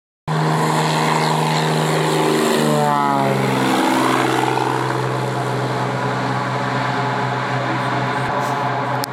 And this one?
Aeroplane Passing Close
The noise a small propeller plane makes when it approaches and passes by. Recorded on the Bolivian Jungle airstrip in 2019
propeller, taking-off, aeroplane, plane, field-recording